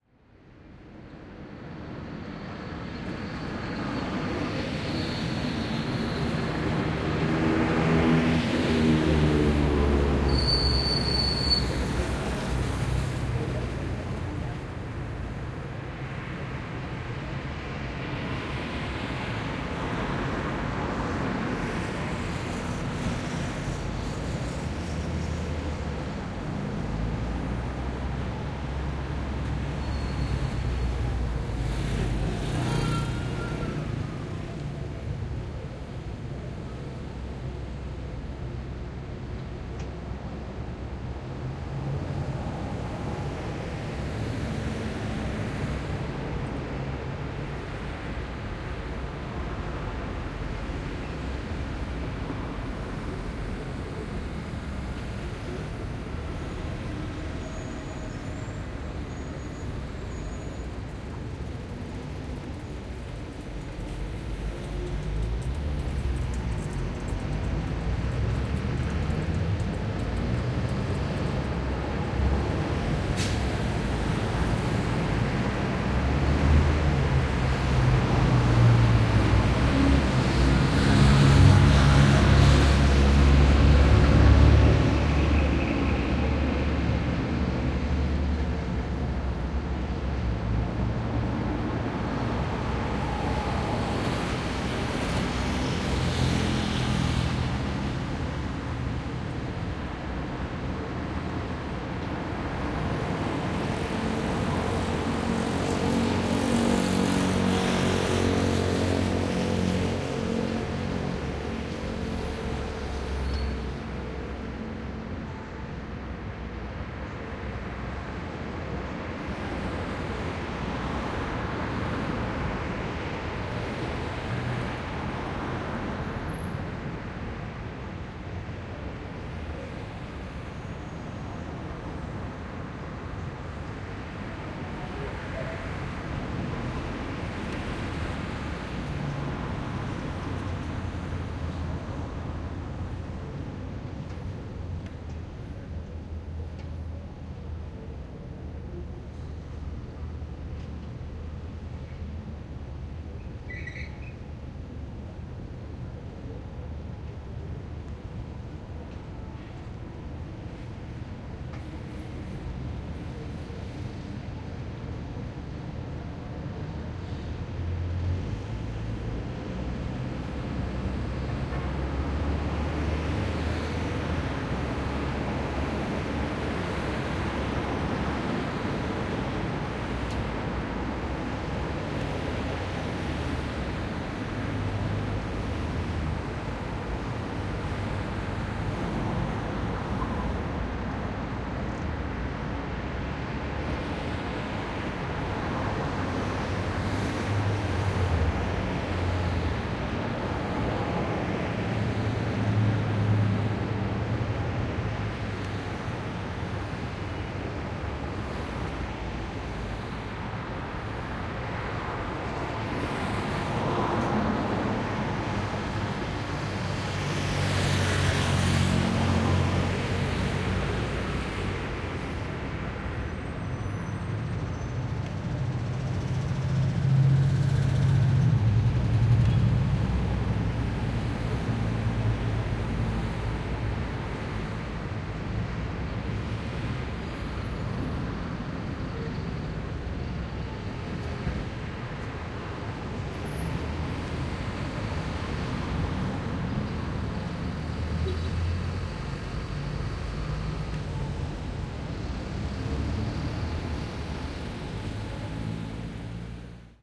Ambiente departamento calle/Ambient department street-Ambient/Ambiente
Ambient recording in a departament in San Luis Potosí Capital with a smartphone
ambience, ambient, ambiente, autos, calle, cars, city, ciudad, departamento, department, street, urban